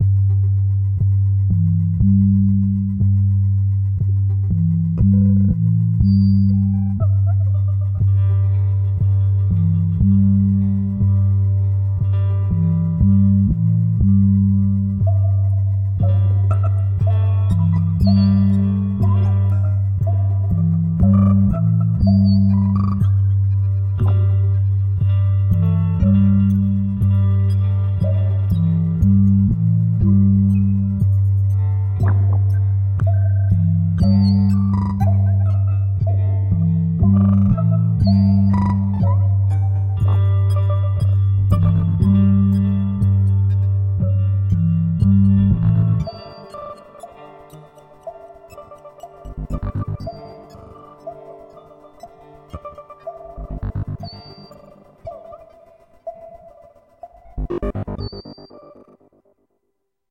MS-Navas norm
Original Soundtrack composed & produced by Sara Fontán & Aalbers recreating a musical soundscape for the neighborhood of Navas from Barcelona.
Aalbers, Calidoscopi19, Music, Navas, OST, SaraFontan, Soundscapes